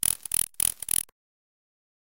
insects noise 008
A short electronic noise loosely based on insects.
ambience, ambient, chirp, electronic, evening, field, insect, morning, noise, pond, synthetic, water